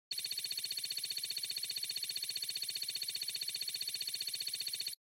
Note: the pre-listening mode can introduce significant distortion and loss of high frequencies into the original phonogram, depending on the density of its frequency spectrum. Sound effects for dubbing screen printing. Use anywhere in videos, films, games. Created in various ways. The key point in any effect from this series was the arpeggiator. Enjoy it. If it does not bother you, share links to your work where this sound was used.